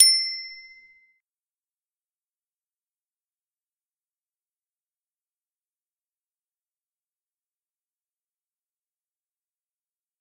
Bicycle Bell from BikeKitchen Augsburg 03
Stand-alone ringing of a bicycle bell from the self-help repair shop BikeKitchen in Augsburg, Germany
bell, bicycle, bike, cycle